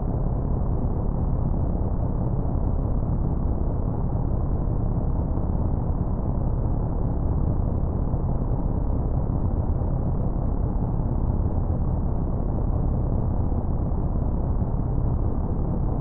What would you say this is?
Ship atmosphere
This is my attempt to recreate the atmosphere aboard any federation starship.
ambience, background-sound, dark, drone, engine, Star-Trek